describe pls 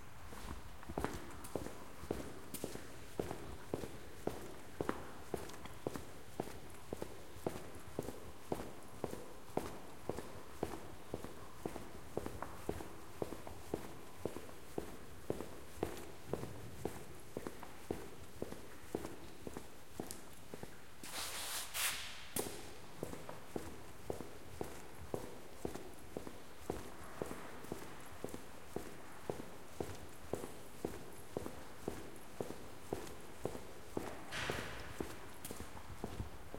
footsteps cellar
stereo recording of footsteps in an underground garage
walk, footstep, walking, underground, step, garage, feet, footsteps, concrete, steps, foot